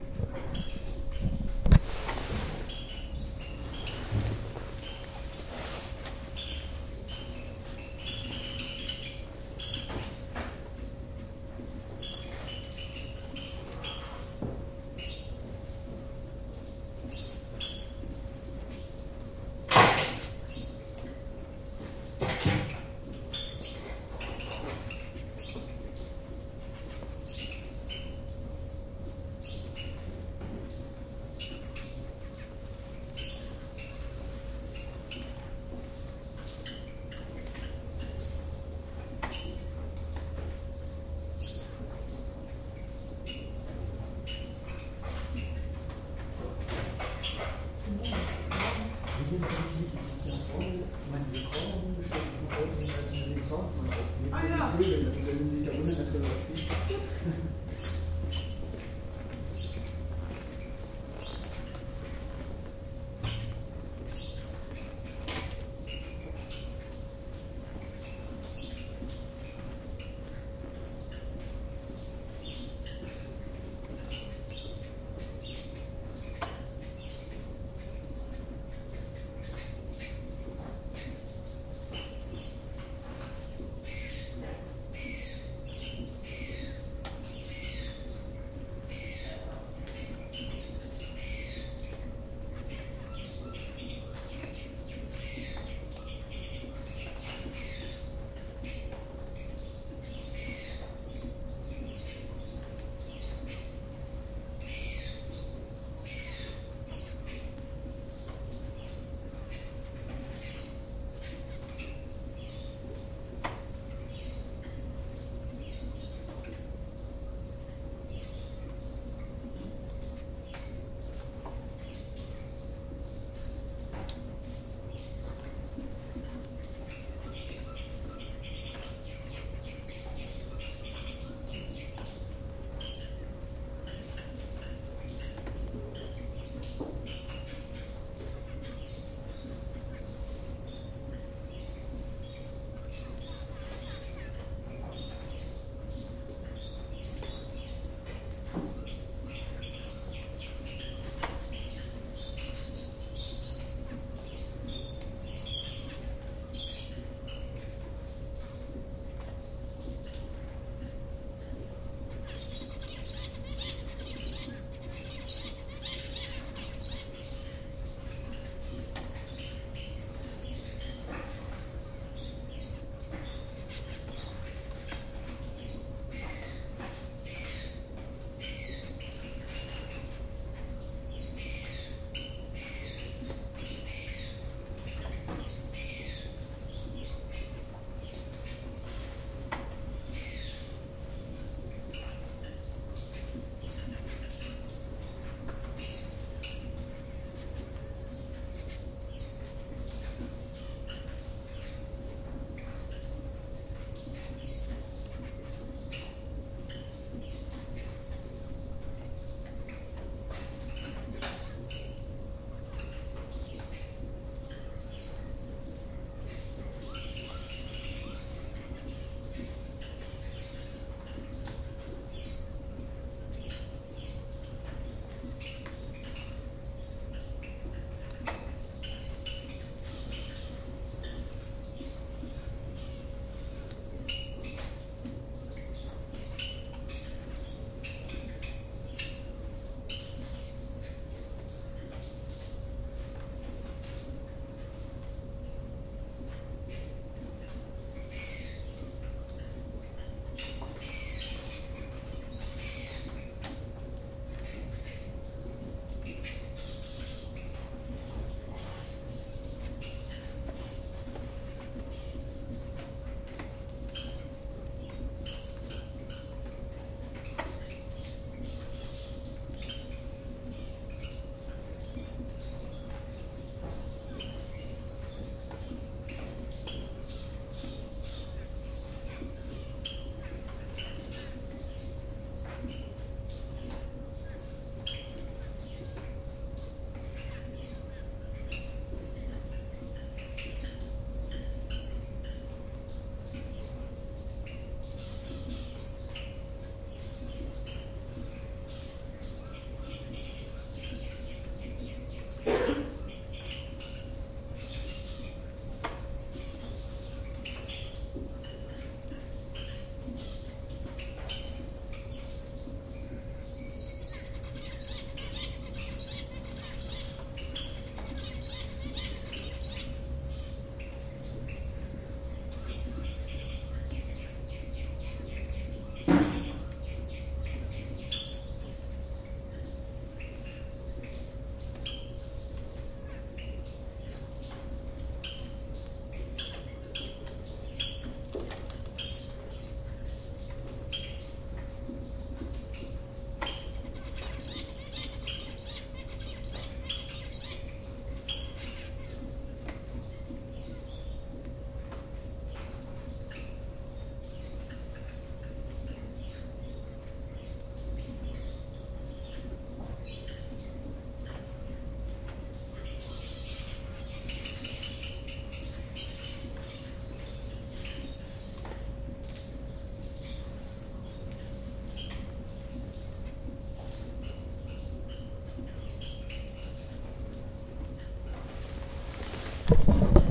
SR000F orchid house
This recording was done in one of the glasshouses of the "Berggarten" in Hanover / Germany.
Apart
from plenty of Orchids and other plants there are different varieties
of finches flying around in this glasshouse: the reason for this
recording.
I placed the recorder in the middle and on this morning there was just one gardener working there.
This recording was done with a Zoom H2 recorder.
The
most unusual feature of the H2 is its triple quadruple mic capsule,
which enables various types of surround recordings, including a matrixed format that stores 360° information into four tracks for later extraction into 5.1.
This is the front microphone track.
With a tool it is possible to convert the H2 quad recordings into six channels, according to 5.1 SMPTE/ITU standard.
Here is a link:
Sorry about the noise of me switching the recorder on and off, but I didn´t wanted to cut this track.
surround, field-recording, surroundsound, birds, finches, zoom, h2